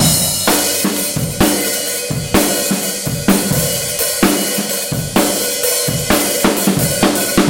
4 bar breakbeat/drumgroove with heavy open hihat. Seamless loop, 128bpm.
Roomy recording of a trashy drumkit from a music school, recorded with a Zoom H4.